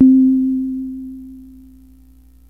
Fm Synth Tone 05